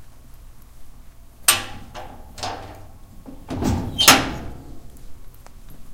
Cell Door 2
Sound of cell door shutting.